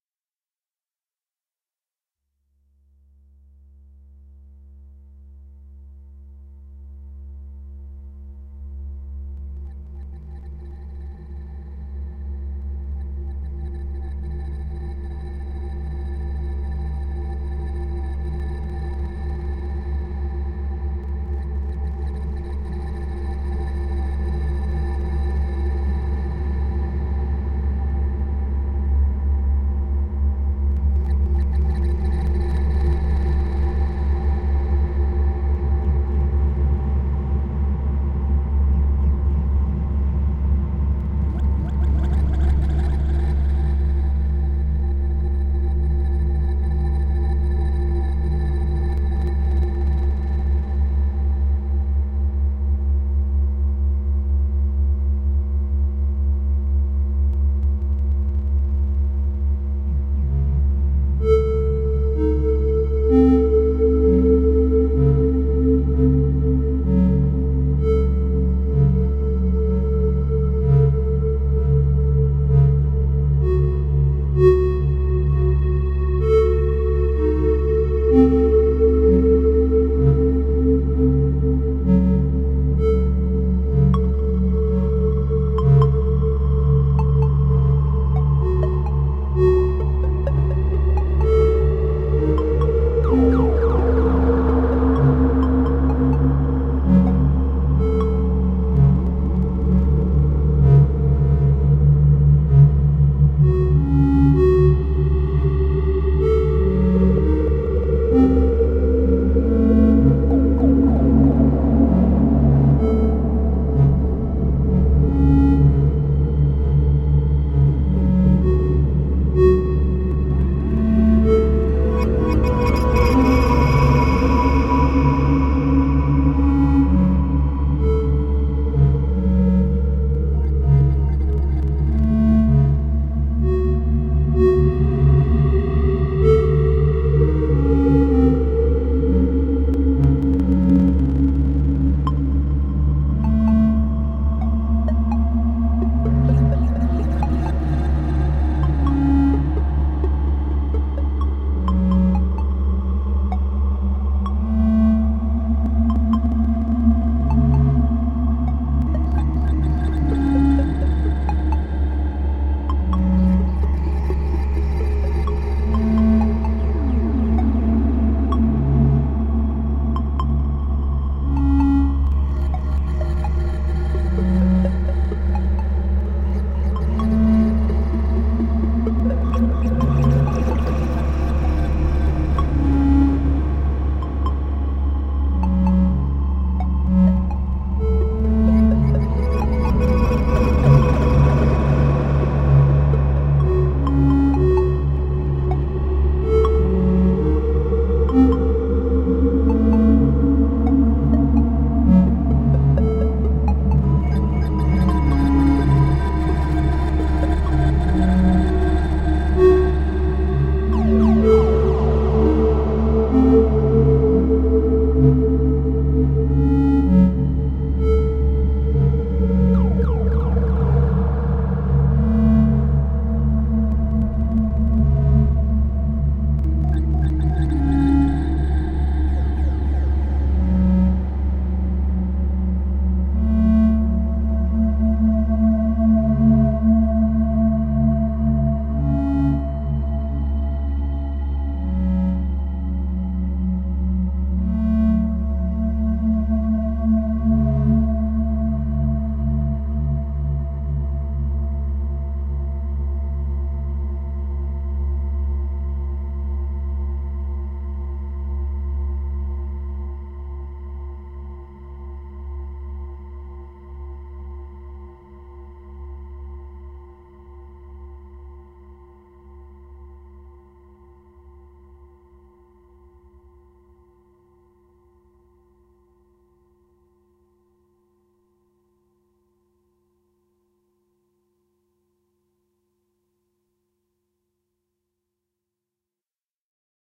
Created with a Korg Electribe 2 processed by a Zoom Multistomp MS-70CDR guitar multi-fx pedal, using the Particle Reverb.
The manual states that it models the LINE6 M9 Particle Verb.
Particle Reverb.
In this piece of audio the Electribe only uses triangle oscillators.
It's always nice to hear what projects you use these sounds for.
Please also check out my pond5 and Unity Asset Store profiles for more:
alien, ambience, ambient, atmosphere, digital, electronic, experimental, fx, guitar-pedal, minimal, music, musical, otherwordly, processing, Science-Fiction, sci-fi, sequence, space, synth, synthesis, synthesizer, triangle, uncertain